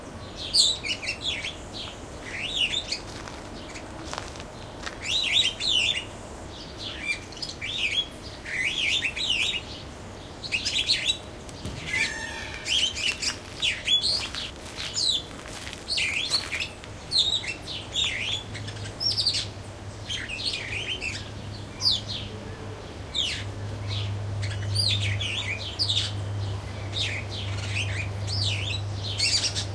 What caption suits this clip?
Song of a Superb Starling, with sparrows and a peacock in the background. Recorded with a Zoom H2.
aviary, bird, birds, exotic, jungle, peacock, rainforest, songbird, sparrows, starling, tropical, zoo